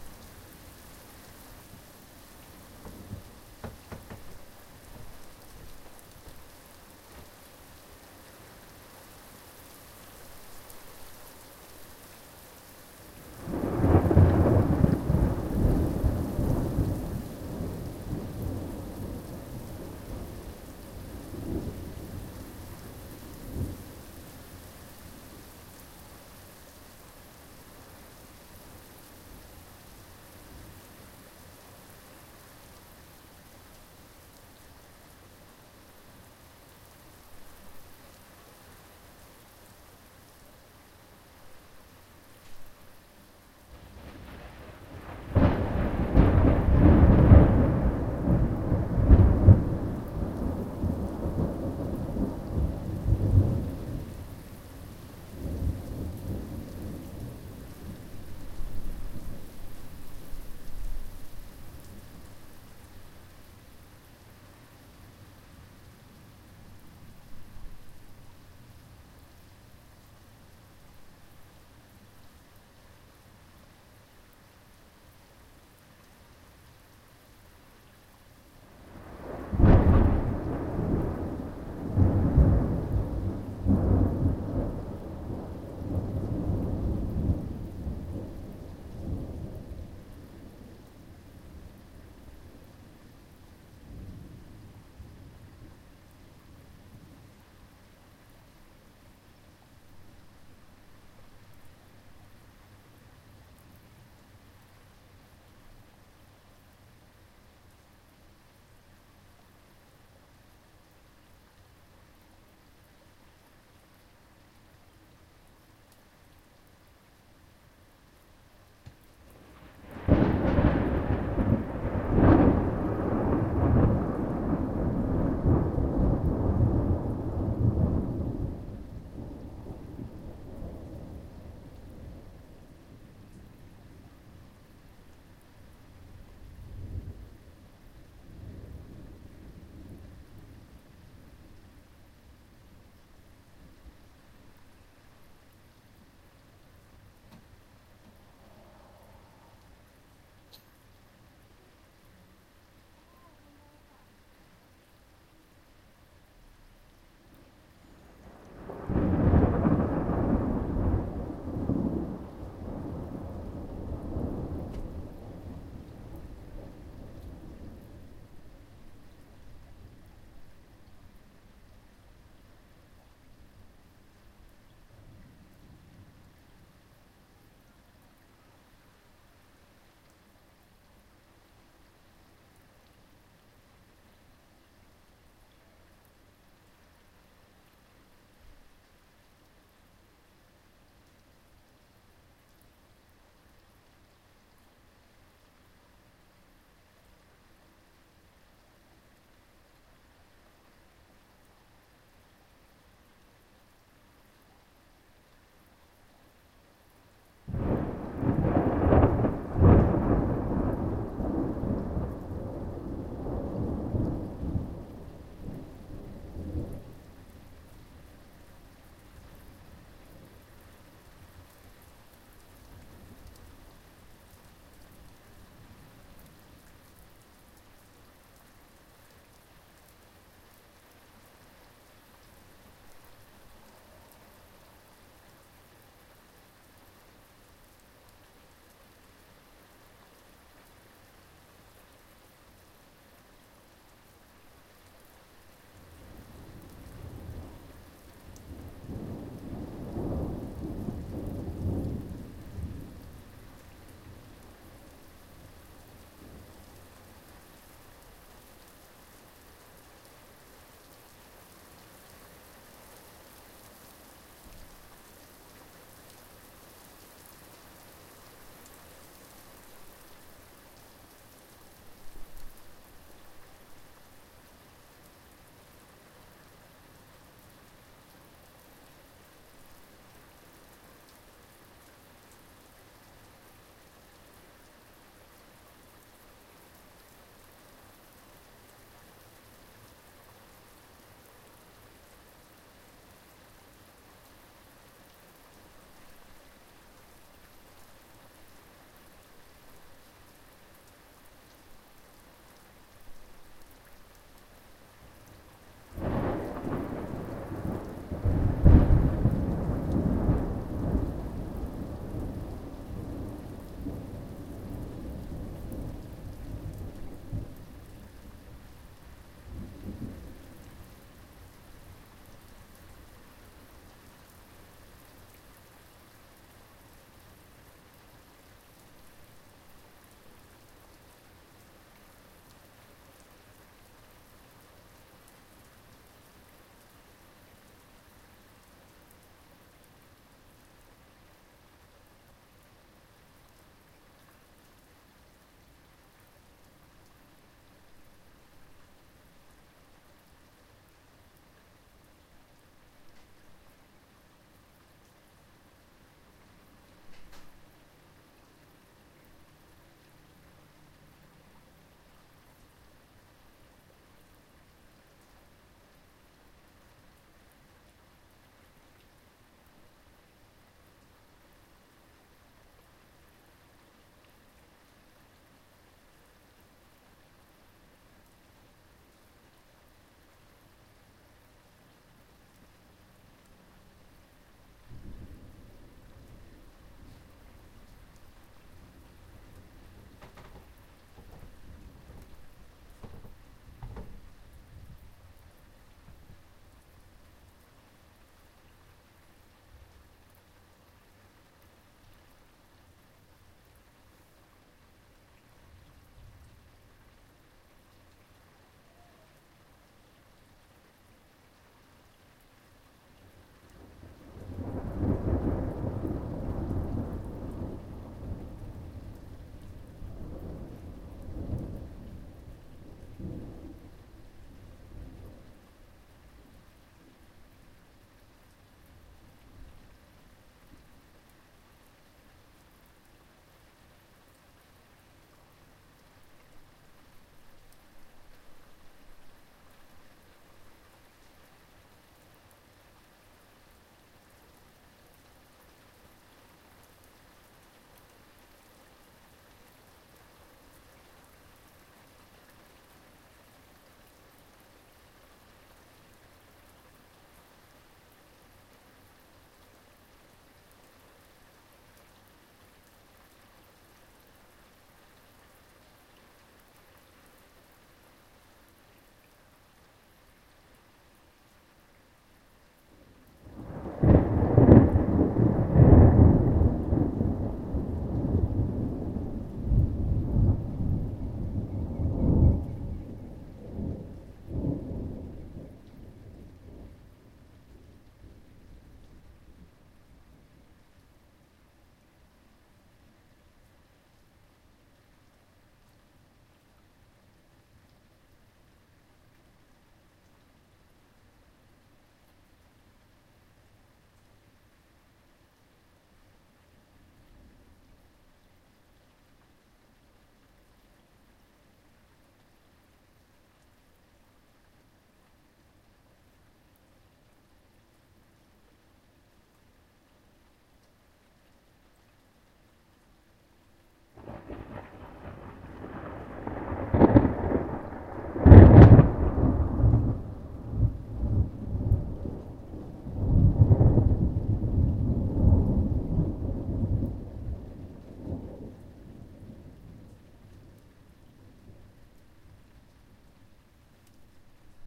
Thunderstorm 1 7-Jun-2016
Tuesday 7-Jun-2016, massive thunderstorms swept through the United Kingdom.
It is rare to get a thunderstorm that is so close and so violent.
I made this recording with my Zoom H1.
At the start, you can hear me walking away from the recorder on a wooden floor.
thunder, wood-floor, lightning, thunder-storm, storm, rain, thuunderstorm, walk, walking, step